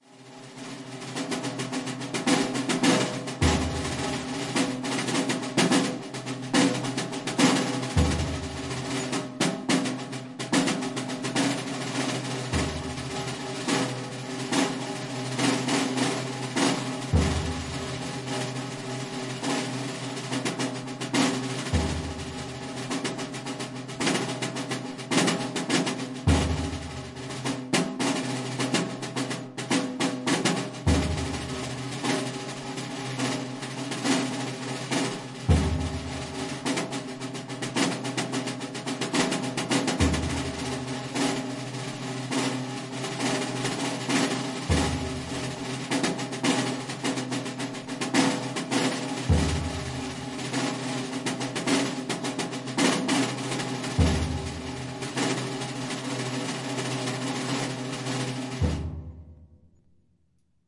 solemn drum ruffle, little drum and big drum, one player
Zoom & Rode mics
drum, music, percussion, slow